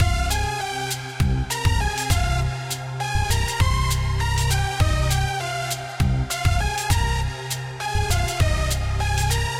Hes Coming
This is a loop created several third party VSTs.
100-BPM, Cinematic, Loop, Sample